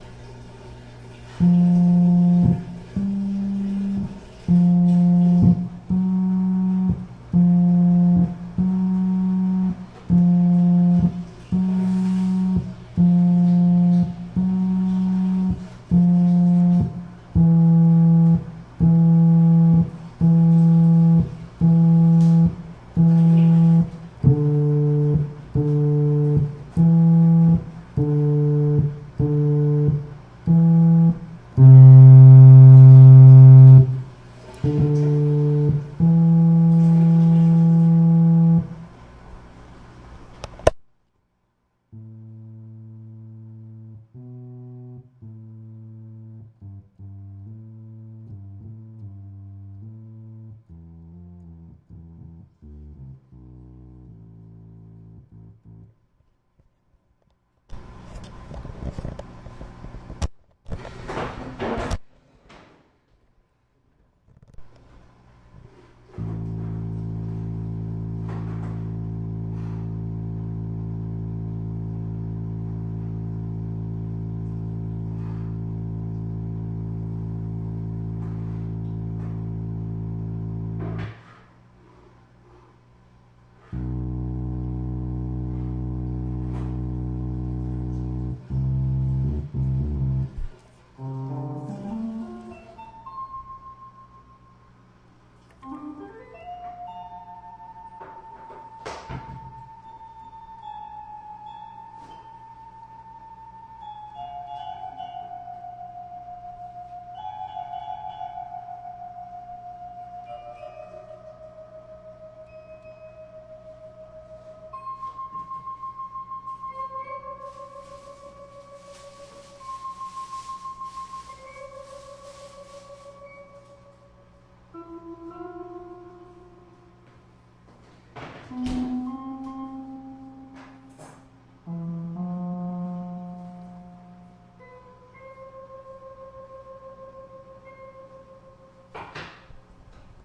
Recording of random organ notes being played. Recorded on Zoom H2.
key, notes, organ, sound